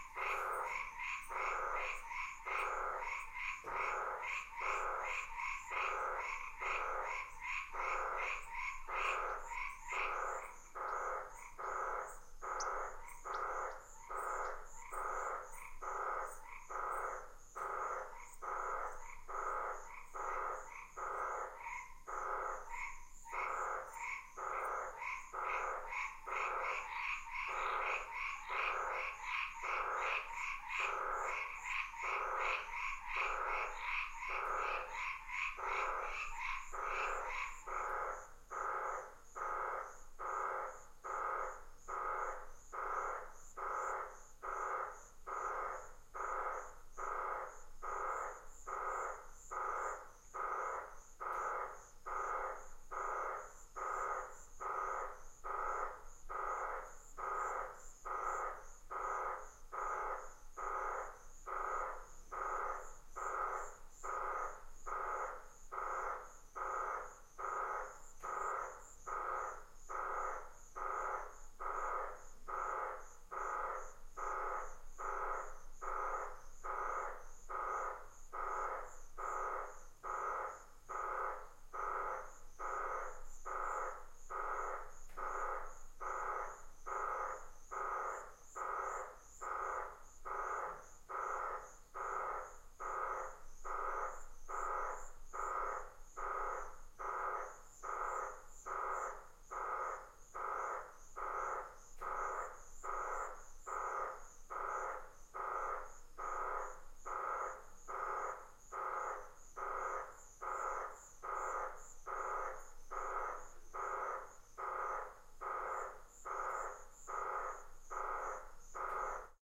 Weird interior recording of Frogs in rural Ghana, Strange metallic ringing